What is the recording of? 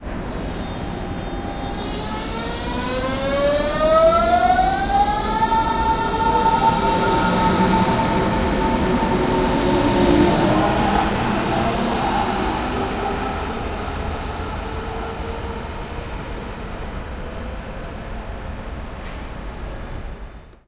S-bahn train leaving station. Recorded Sept 3 2018 in Berlin, DE with Zoom H4N.
bahn, berlin, depart, far, fi, field-recording, german, leaving, rail, sci, station, Train